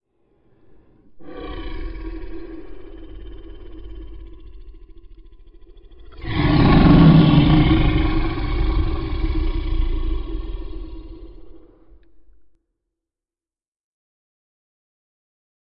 Beast Roar lvl4
dinosaur, zombie, scary, growl, vocalization, snarl, roar, horror, monster, creature, dragon, beast, animal